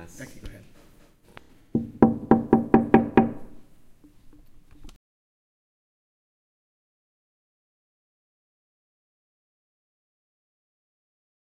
The sound of a steady knock on a glass pane. It was recorded with Zoom H4n's stereo microphone.
window, glass, knocking